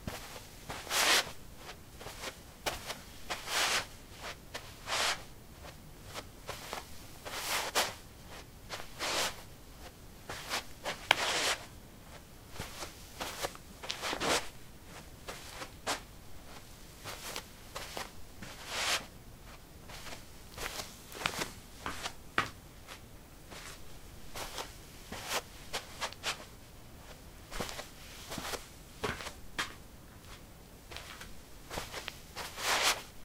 Shuffling on carpet: low sneakers. Recorded with a ZOOM H2 in a basement of a house, normalized with Audacity.